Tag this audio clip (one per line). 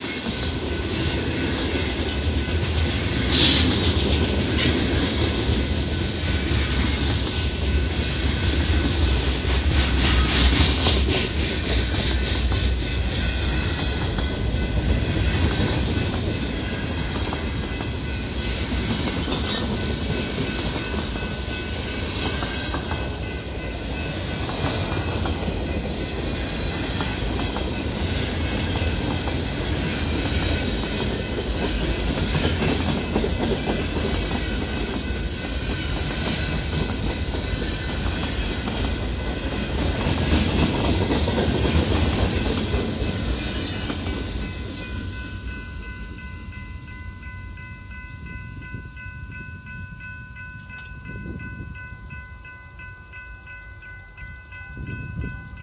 tracks
freight
train
mechanical
boxcars
locomotive
railway
repetitive
bell
railroad